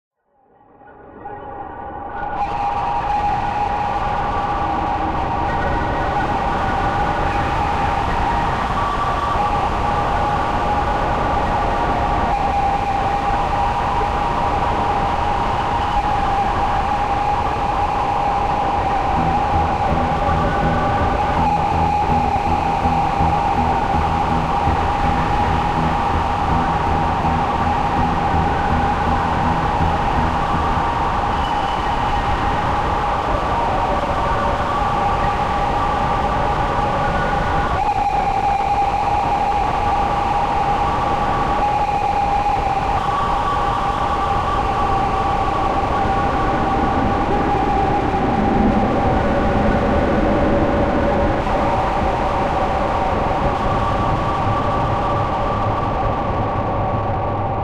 The Wind Roars!!!!!
academic, roar